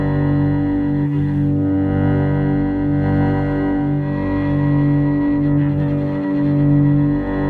Ambience Sinister Electric Cello Loop 02
An electric cello ambience sound to be used in sci-fi games, or similar futuristic sounding games. Useful for establishing a mystical musical background atmosphere for building up suspense while the main character is exploring dangerous territory.
indiedev, ambience, loop, games, video-game, soundscape, game, sfx, dark, electronic, atmosphere, sci-fi, electric, cinematic, videogames, cello, drone, indiegamedev, gamedeveloping, gaming, futuristic, gamedev, ambient